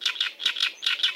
samples in this pack are fragments of real animals (mostly birds)sometimes with an effect added, sometimes as they were originally
funny, sonokids-omni